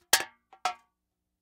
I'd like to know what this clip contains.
empty soda can drop 4
Empty soda can dropped on a hard surface.
Foley sound effect.
AKG condenser microphone M-Audio Delta AP
can, effect, foley, soundeffect